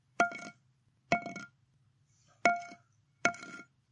Metal Bat Hitting Rock
A metal bat hitting a rock a few times. Cleaned in Audacity.
bat, hit, impact, metal, rock, thud